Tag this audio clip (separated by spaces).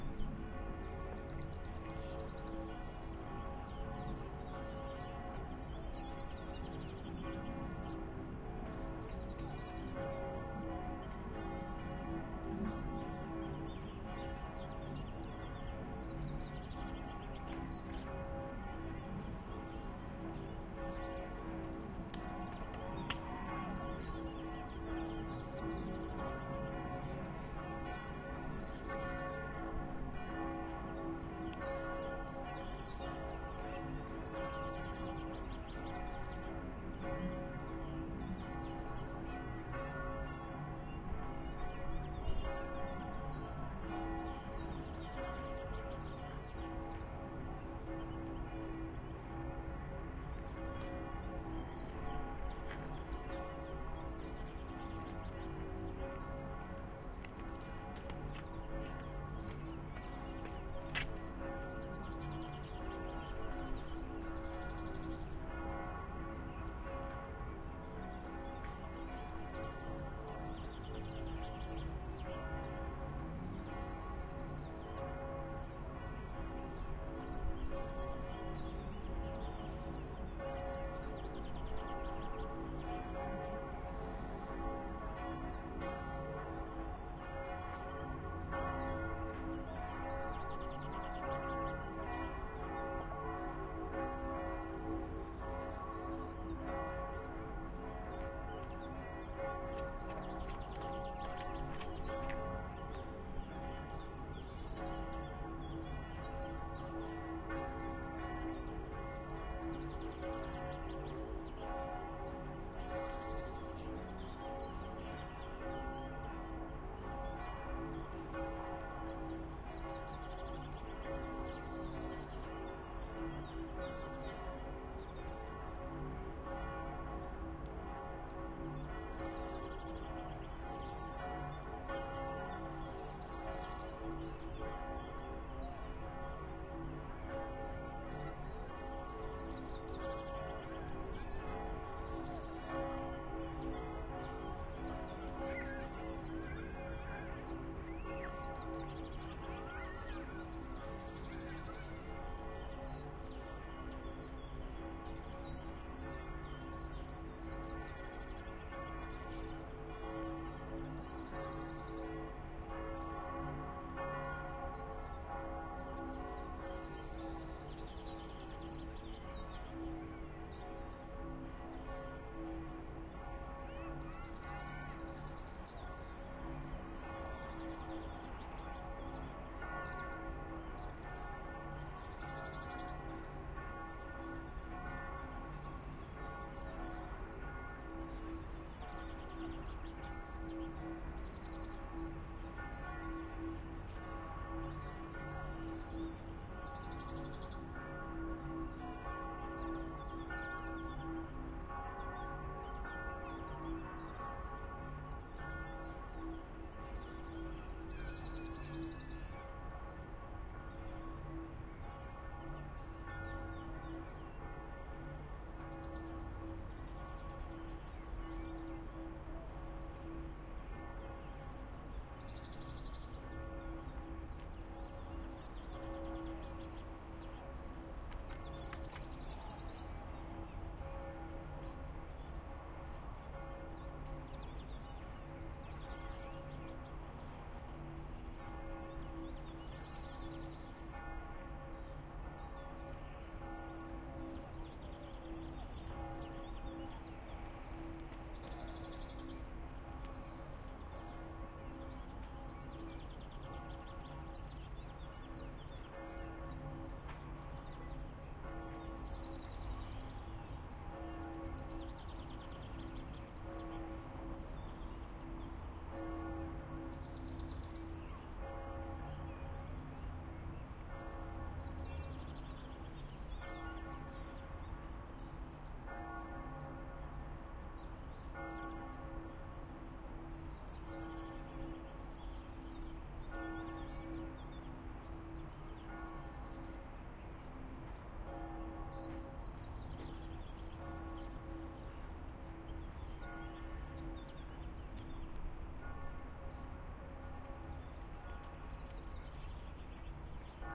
bells field-recording natural-sound traffic-sound